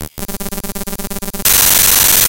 00 glitch is hard intensiv
noise, rekombinacje, core, glitch, breakcore